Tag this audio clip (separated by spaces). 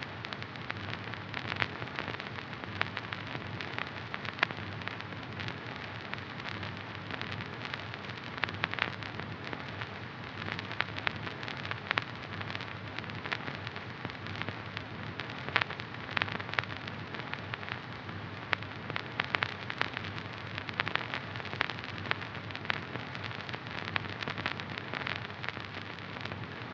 ambiance ambience ambient atmosphere background background-sound crackle general-noise loop noise static vhs vhs-hum vinyl white-noise